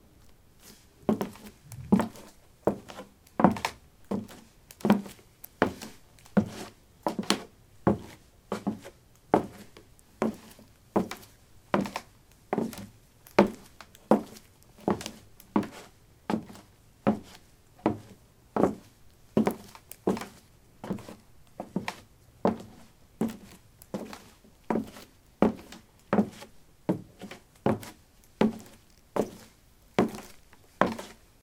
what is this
wood 07a leathersandals walk
Walking on a wooden floor: leather sandals. Recorded with a ZOOM H2 in a basement of a house: a large wooden table placed on a carpet over concrete. Normalized with Audacity.
footstep, footsteps, step, steps, walk, walking